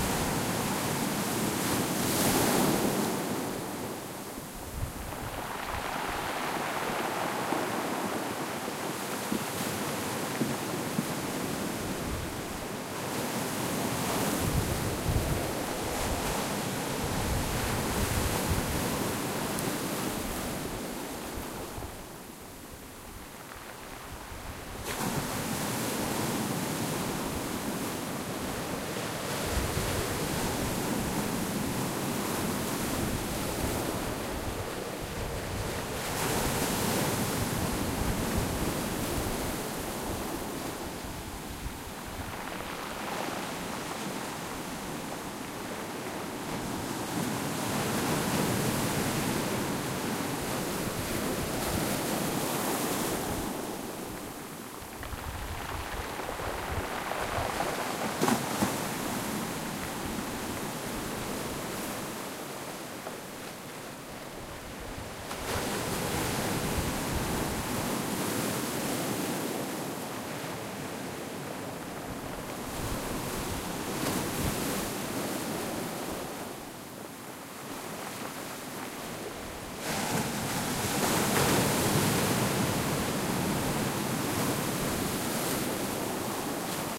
San Simeon Beach at Midnight 1
Taken at the Pacific ocean shore.
beach, california, coast, midnight, ocean, san-simeon, seaside, shore, water, waves